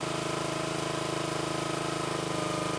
engine-idle-2
small honda motor idles, Tascam DR-40
lawn, log, mower, pull, small, start, wood